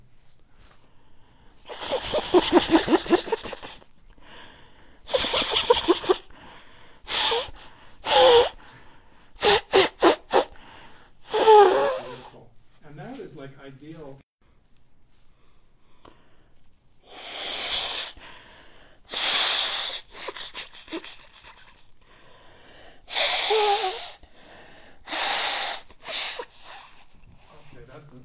Young Nose Blowing
blowing my nose, with deep emotion and feeling of the tissue.
blowing, nose, sniffing